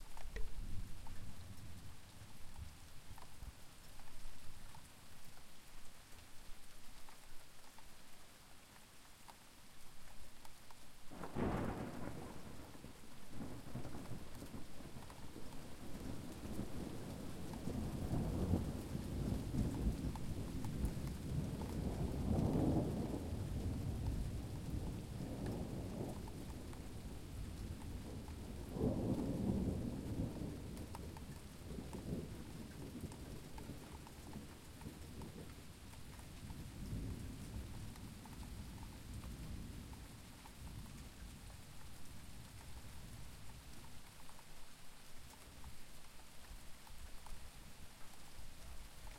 Rain and Thunder
Rain with thunder rumbling recorded from my window.
field-recording, lightning, storm, thunder, thunderstorm